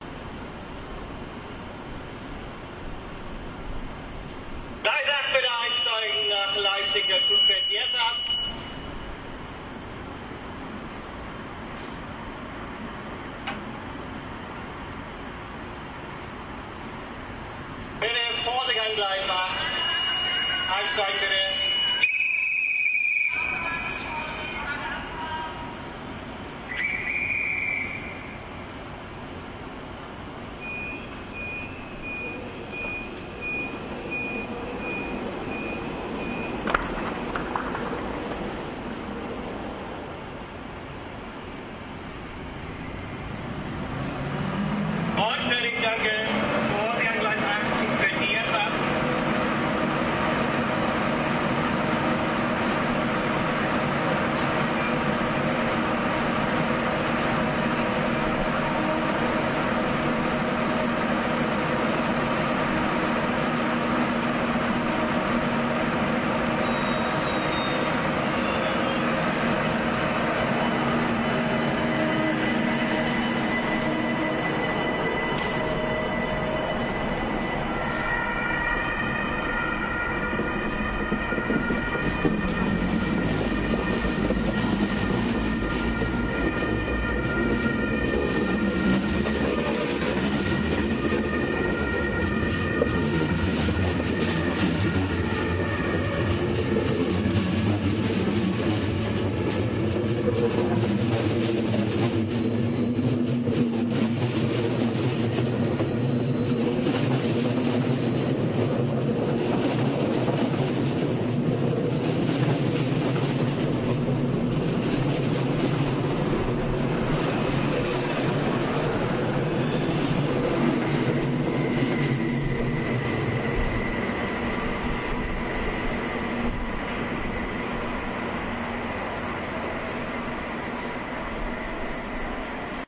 AMB Hamburg Hauptbahnhof Announcement&ICETrain to Leipzig Leaving 7pm 2013-11-04 Autogain!-((
Nagra Ares II Recordings at the Main Train Station in Hamburg (evening 2013-11-04)
Here we have some announcements, unfortunately with Autogain
Ambience, Announcement, Hamburg, Hauptbahnhof, Station, Train